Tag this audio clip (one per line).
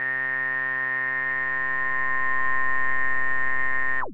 multisample
square
subtractive
synth
triangle